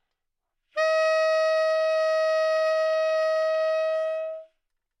Sax Tenor - D#5
Part of the Good-sounds dataset of monophonic instrumental sounds.
instrument::sax_tenor
note::D#
octave::5
midi note::63
good-sounds-id::5029
Dsharp5, good-sounds, multisample, neumann-U87, sax, single-note, tenor